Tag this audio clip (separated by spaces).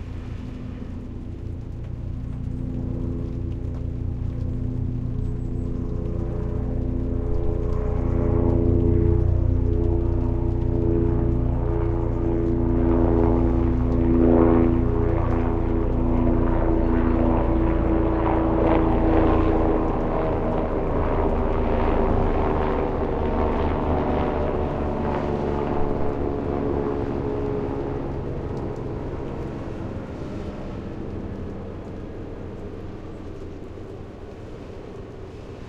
motor rotor